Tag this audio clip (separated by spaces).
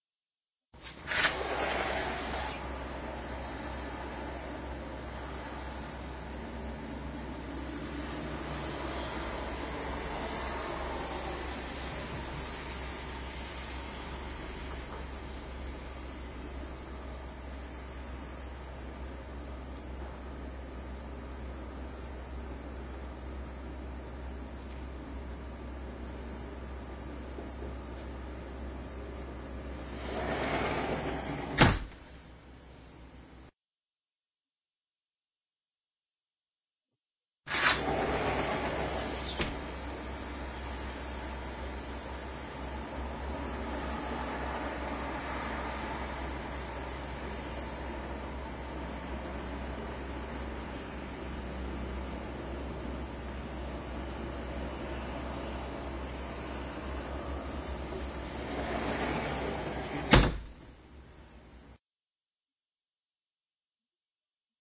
Blue Field-Recording Ice SFX